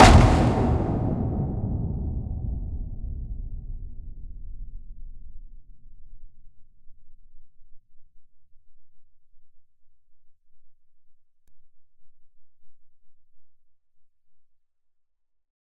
Bigfoot explosion jingle component